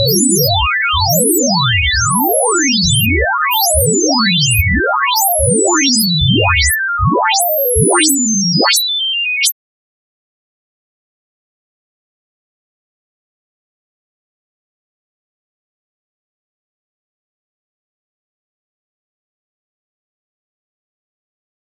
asceninding weirdness 6 pixels
asceninding, weird, weirdness